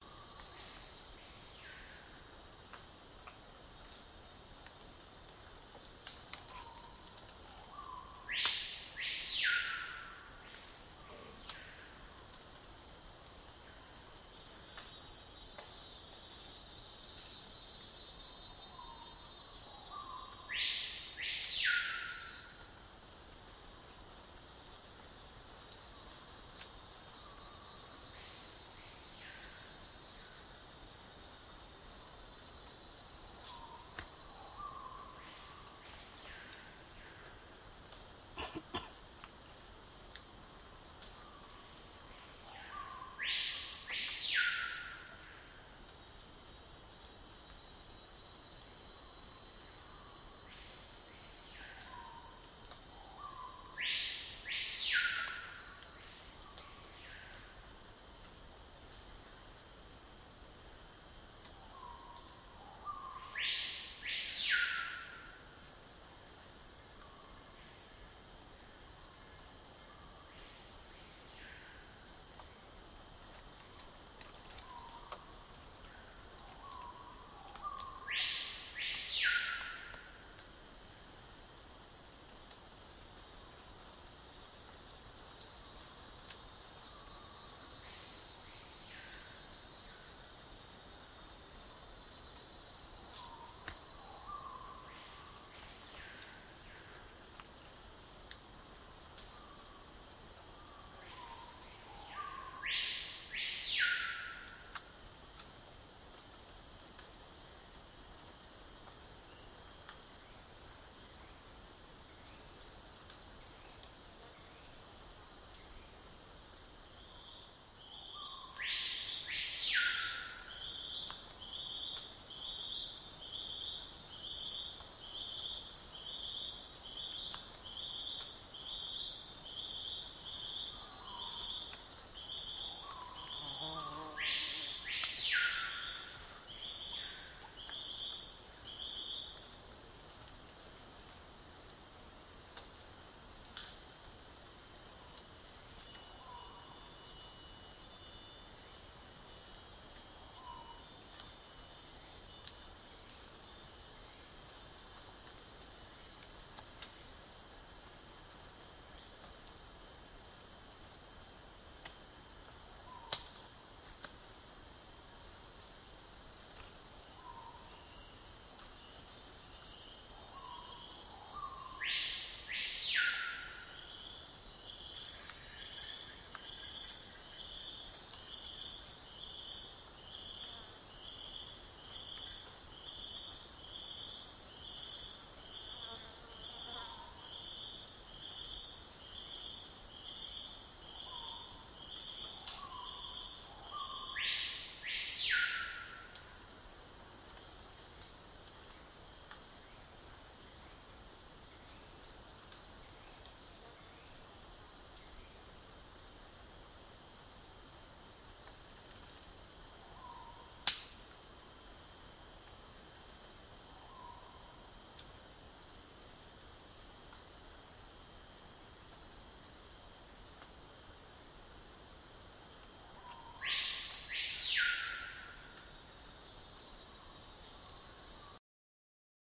Amazon jungle night crickets awesome loop
Amazon, crickets, jungle, night